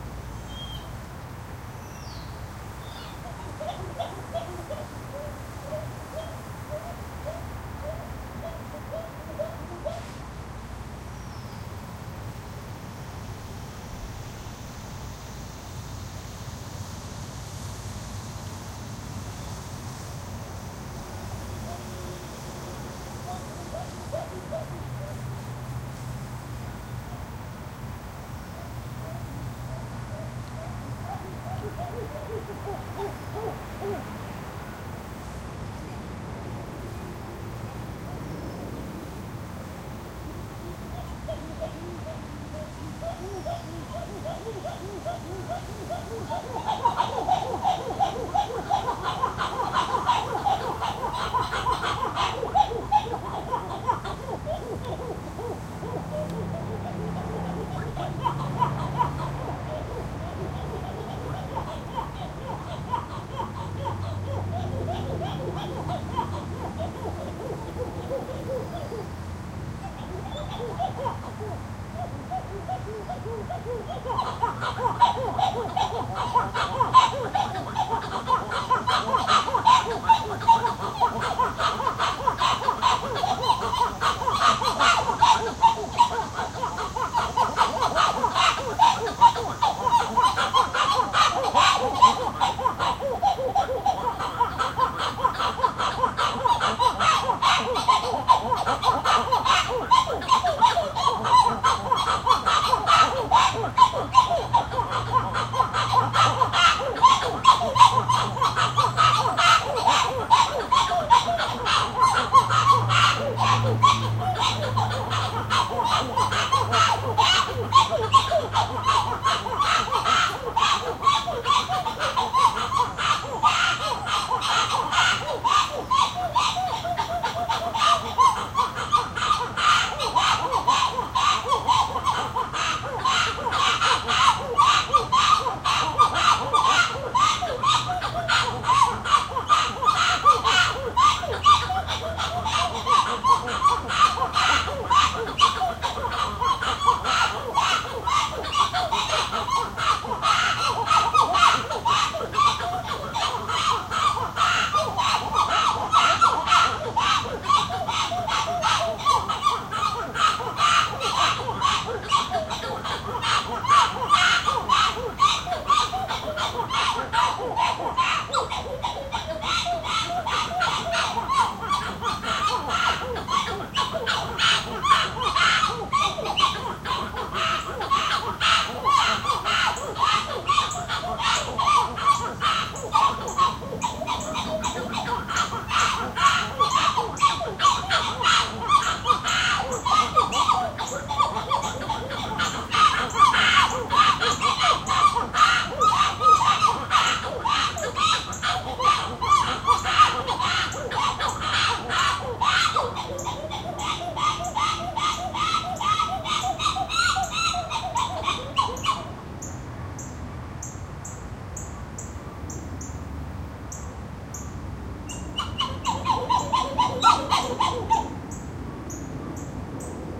Recorded at the Dallas Zoo. This is a family group of White-eared Titi Monkeys calling. This is their territorial chorus, which starts off with only some quiet calls but gradually builds up towards the end. There are also some crickets and cicadas in the background.
crickets, primate, zoo